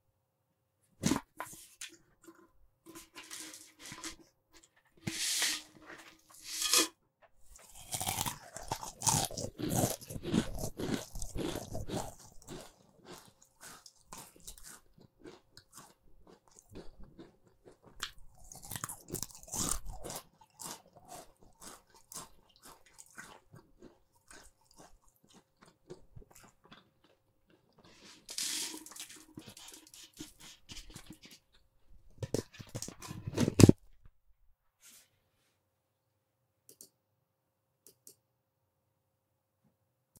This is me eating Pringles. Recorded with a Rhode NT 1a in my home studio.
Eating, Foley, Pringles, Studio